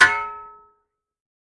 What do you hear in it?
A metallic impact.
hit, metal, impact, clang